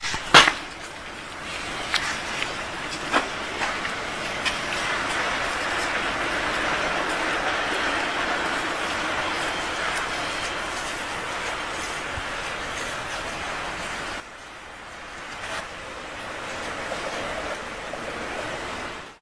closing boot220810
22.08.2010: about 21.30. the recording of closing a boot. some man was coming back at home with huge shopping. in the background traffic noise. on Dolina street in Poznan.
street, steps, car, ambience, traffic-noise, housing-estate, poznan, field-recording, noise, man, boot